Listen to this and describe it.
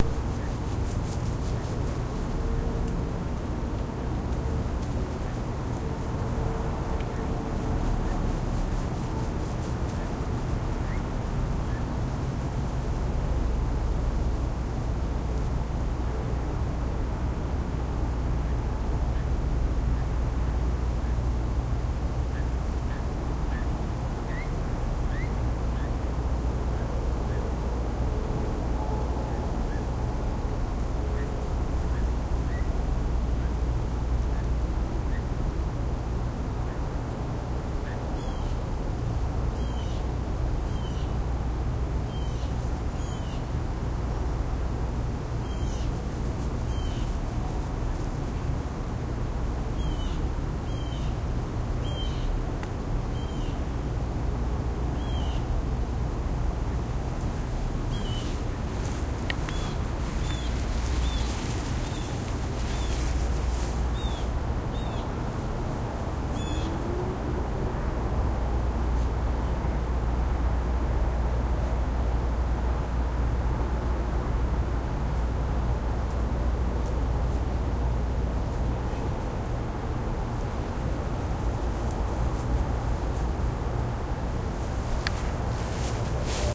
SonyECMDS70PWS squirel hawk
bird, digital, electet, field-recording, hawk, microphone, squirrel, test